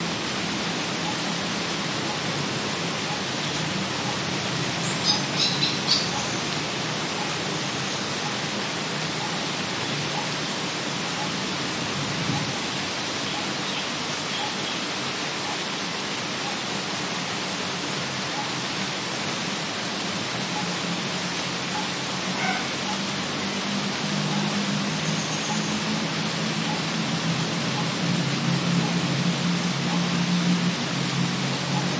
light rain at my Bangkok house recorded with a pair of Shure SM58.
rain, house, thunder